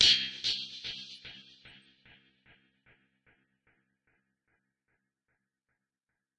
BATTERIE PACK 2 - Dubby stick delayed phasors
BATTERIE 02 PACK is a series of mainly industrial heavily processed beats and metallic noises created from sounds edited within Native Instruments Batterie 3 within Cubase 5. The name of each file in the package is a description of the sound character.